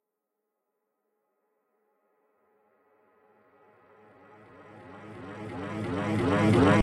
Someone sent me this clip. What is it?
reverse fx 10
build, buildup, fx, reverse, riser, sweep, swell, up, uplifter